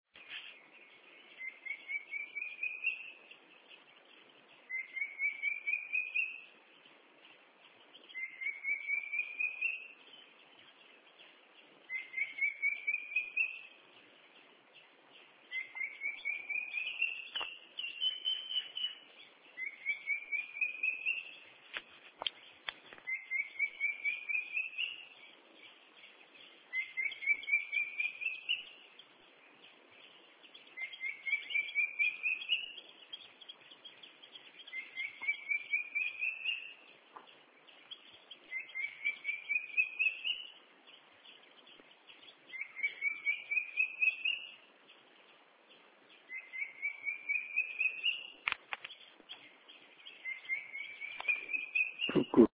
Surniculus lugubris
Cuckoo call heard on 24 April 2013
bird, cuckoo, India